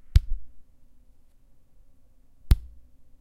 A manly thump of the chest.
Recorded with Zoom H4N & denoised with RX.